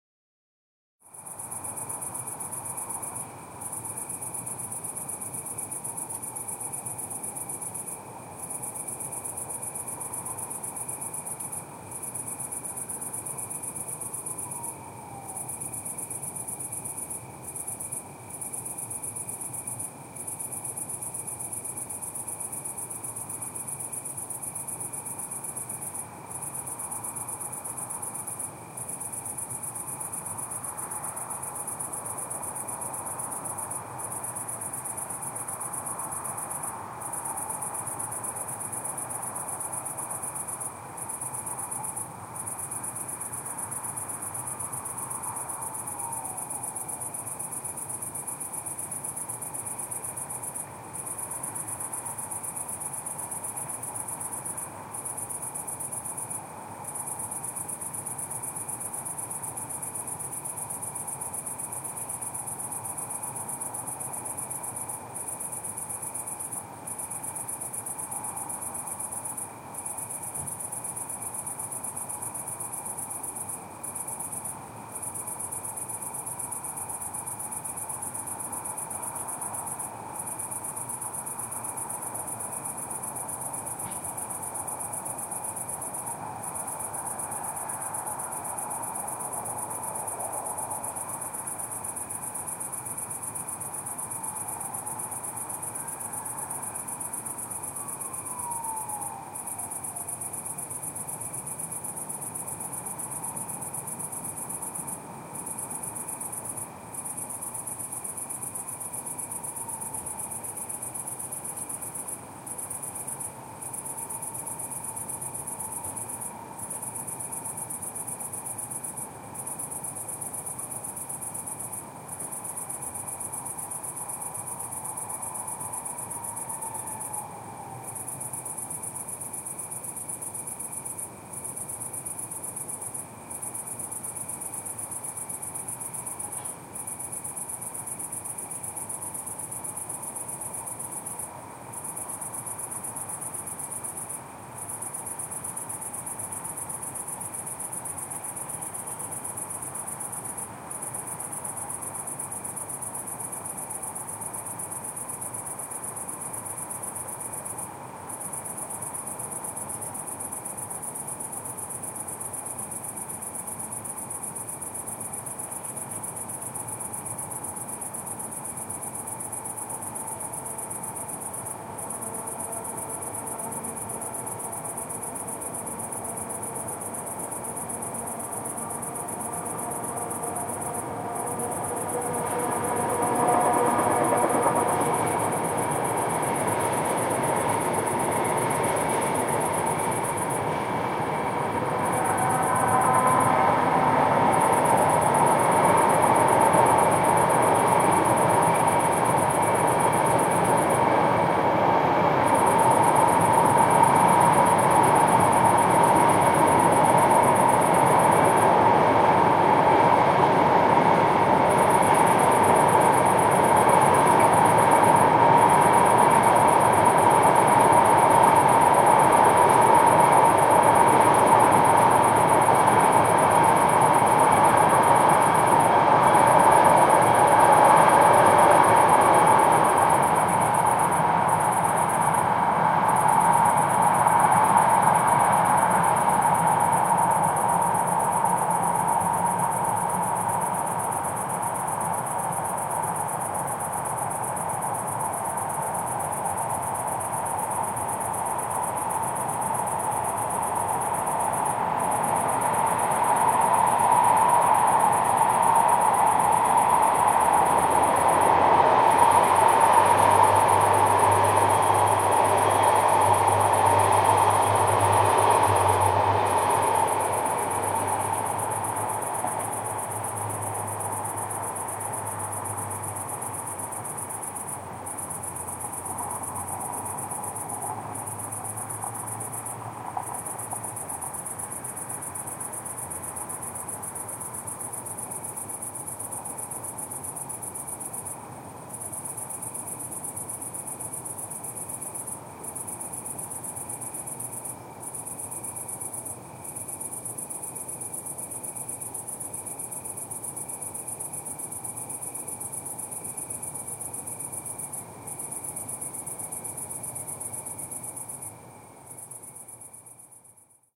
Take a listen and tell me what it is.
Cricket in tree, train
Recording of the Prague ambiance in the evening.
Recorded at night in august, on the Prague periphery. Crickets, cars, trams in distance, sirens, some hits, 2 trains in distance passing by.
Recroded with Sony stereo mic on HI-MD
amb, city, cricket, evening, night, prague, praha